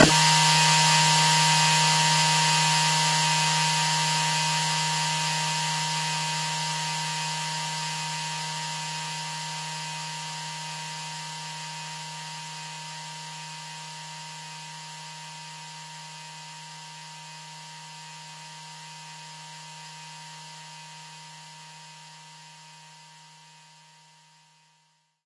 PPG 001 Dissonant Weirdness E2
This sample is part of the "PPG
MULTISAMPLE 001 Dissonant Weirdness" sample pack. It is an experimental
dissonant chord sound with a lot of internal tension in it, suitable
for experimental music. The sound has a very short attack and a long
release (25 seconds!). At the start of the sound there is a short
impulse sound that stops very quick and changes into a slowly fading
away chord. In the sample pack there are 16 samples evenly spread
across 5 octaves (C1 till C6). The note in the sample name (C, E or G#)
does not indicate the pitch of the sound but the key on my keyboard.
The sound was created on the PPG VSTi. After that normalising and fades where applied within Cubase SX.
multisample, ppg, experimental, dissonant